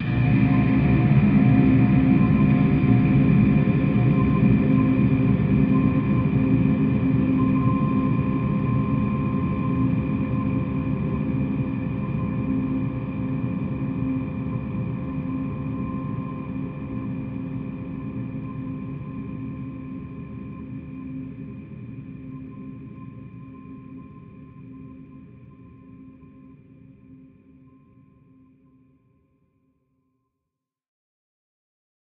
Electrical server sounds recorded and sampled from field recording. Mastered and edited through DAW software, Logic Pro X. Theme: Sci-fi, horror, ambient, scary, dark, drone, metallic and futuristic.
Industrial: Electric server, noise. Sizzle, machine, machine gear, mechanics, reverb, large room, scary. These sounds were sampled, recorded and mastered through the digital audio workstation (DAW), ‘Logic Pro X’. This pack is a collection of ambient sounds stylised on an industrial soundscape. Sampling equipment is a ‘HTC Desire’ (phone).